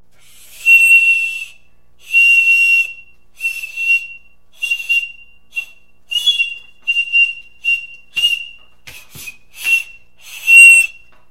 Kid noisily playing a horn type party favor.